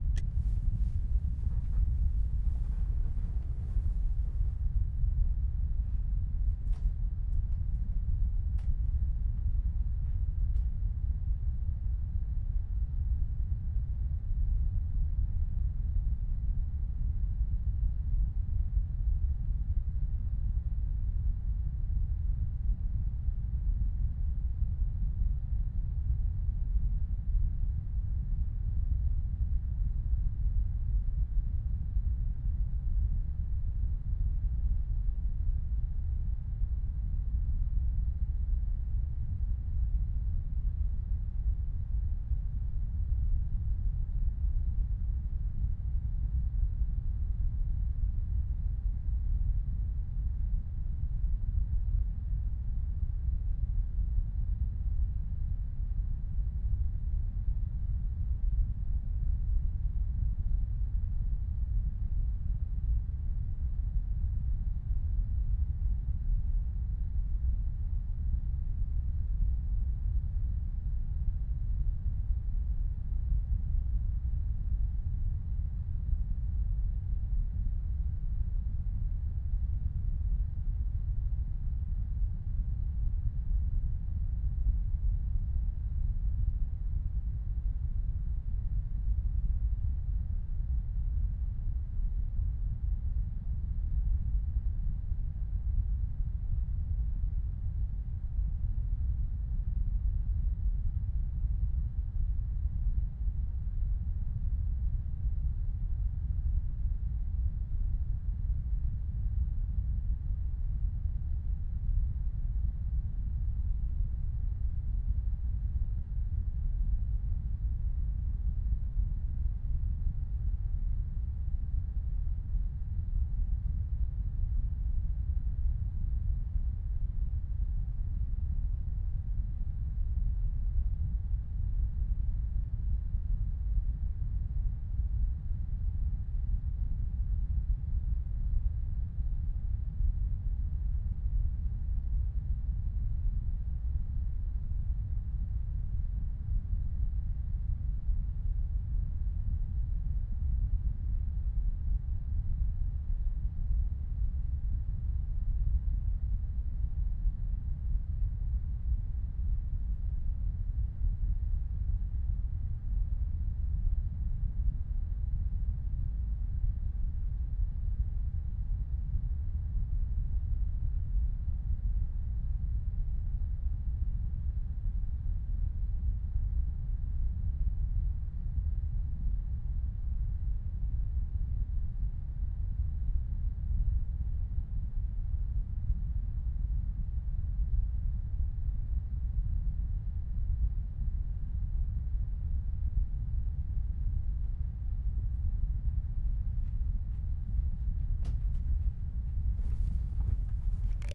Low Rumble
noise, wind, rumble